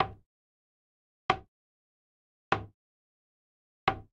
Open Toiletseat
Tested out my new Zoom F8 with a Slate Digital ML-2 Cardiod Smallcondenser-Mic. I decided to record different sounds in my Bathroom. The Room is really small and not good sounding but in the end i really like the results. Cheers Julius
bathroom, clang